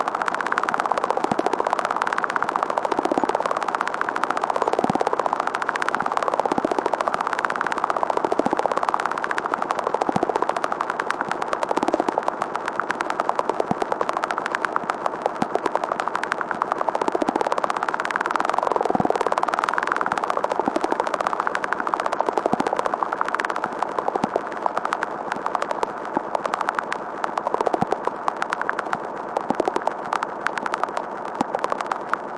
synthetic, cricket-like sounds/atmo made with my reaktor-ensemble "RmCricket"